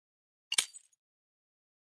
Breaking Glass 20
break, breaking, glass, shards, shatter, smash